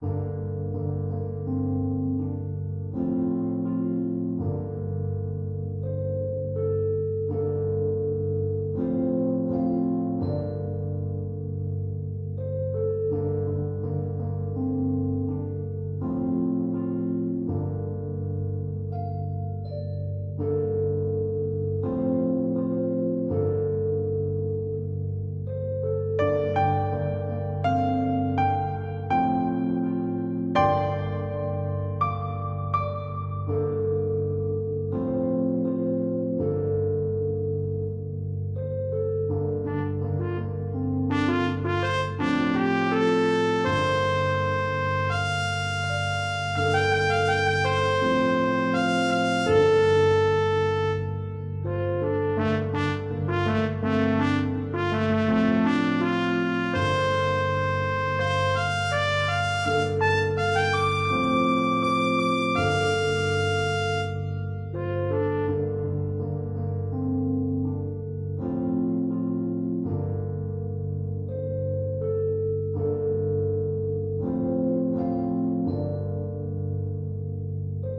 A melancholic but rhythmic theme that heavily features keyboard.